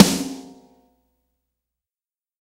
Paramore Style Snare
paramore punchy tight phat fat